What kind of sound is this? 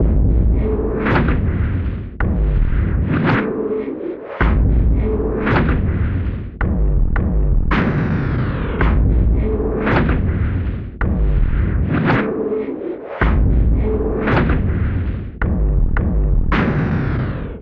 Slow Beast (Mixdown)
This is a 109 BPM loop I made for a song released in 2007. This is the mixdown, but there is a highpass and a lowpass version of this beat as well.
Hope you like it.
109, beat, bpm, dark, loop, low, slow